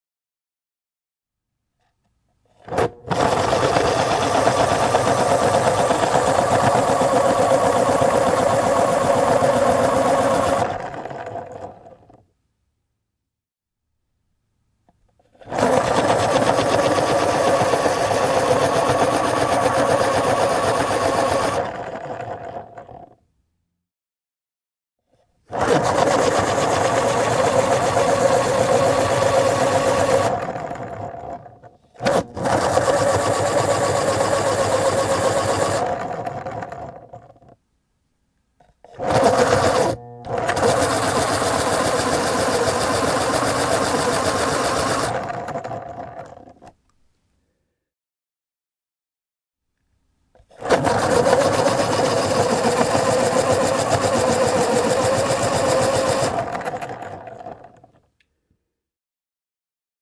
pencil sharpener
pencil, pencil-sharpener, sharpener, motor